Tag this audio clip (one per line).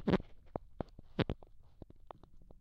contact-mic
handling
homemade
noise
piezo